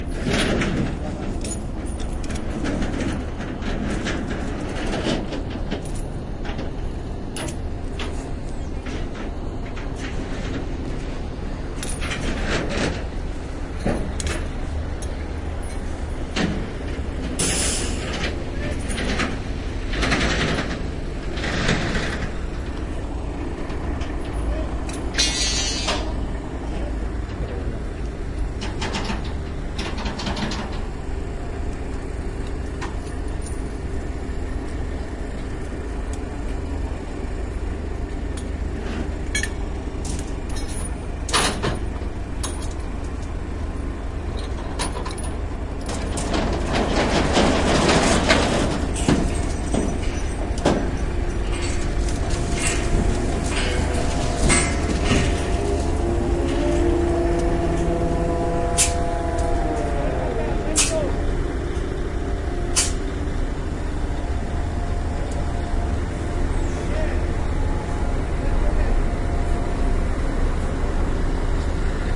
Engine of port crane moving loads around, chains and metal rods falling to the ground. Shure WL183 capsules, Fel preamp, Olympus LS10 recorder. Recorded in the port of Seville during the filming of the documentary 'El caracol y el laberinto' (The Snail and the labyrinth), directed by Wilson Osorio for Minimal Films. Thanks are due to the port authority for permission to access the site to record, and to the harbor workers for help
docks, engine, field-recording, filming, harbor, industrial, metal, port, south-spain, steel